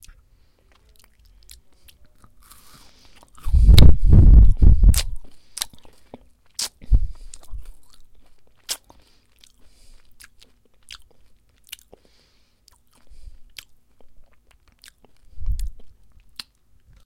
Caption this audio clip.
gross chewing salivating